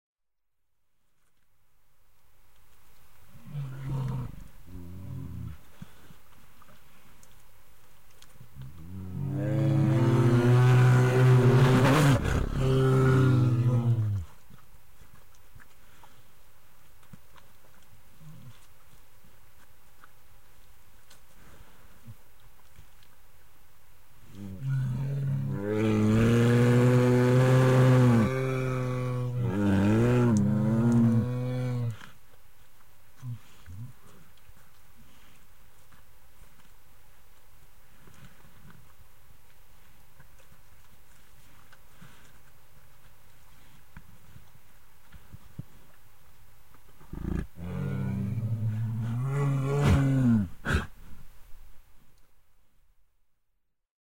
Grizzly Bear growl eating
grizzly bears eating and growling in yellowstone national park
bear, field-recording, grizzly, grizzly-bear, vocalisation